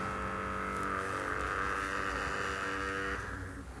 0006VK Take 5
Short sfx of vibrator vibrating or buzzing. Higher pitch.
deep, buzzing, vibrator, sex, toy, buzz